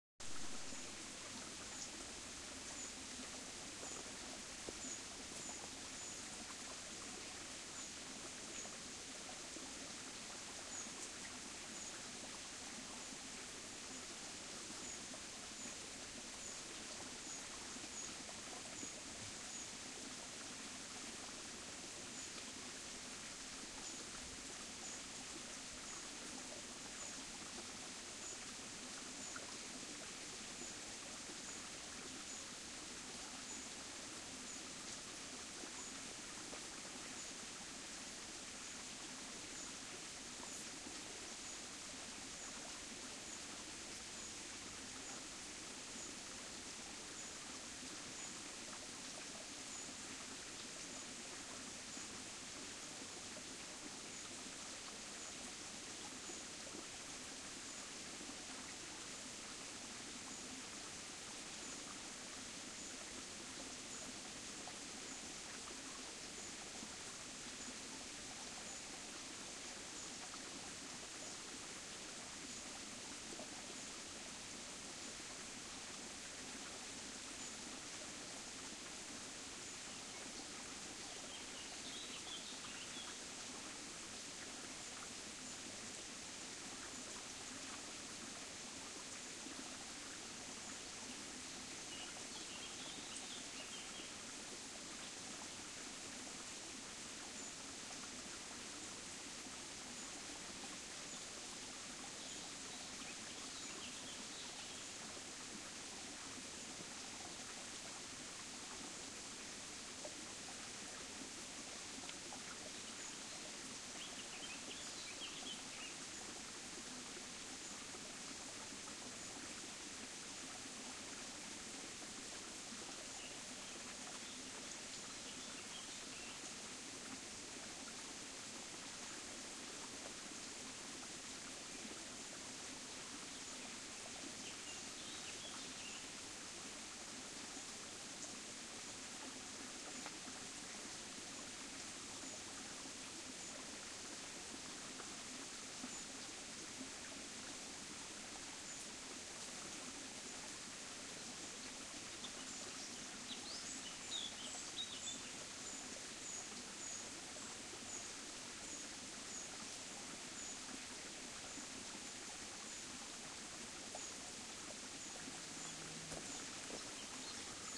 Creek/Brook and Birds (Eiderbach in Rhineland-Palatinate)
A recording of the small Eiderbach brook flowing through the Eiderbachtal in Rhineland-Palatinate. Occasional birdsong can be heard. The recording was taken at an idyllic spot where the small creek flows over pebbles and around rocks, in the dense vegetation of trees and bushes.
The recording was made on 3th July 2022 at 11:40 am with my Huawei P10 lite, no additional processing was applied.
ambient, babbling, birds, brook, creek, field-recording, forest, nature, rivulet, water